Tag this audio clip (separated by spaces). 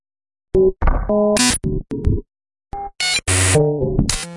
Abstract Loops Percussion